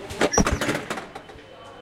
808 Russell Square gate
A simple sound of the gate or barrier at the entrance to Russell Square tube station in London.
field-recording, gate, railway